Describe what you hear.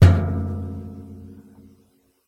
Appliance-Washing Machine-Door-Hit-01
The sound of a washing machine's door being hit with a finger.
Boom, Door, Washing-Machine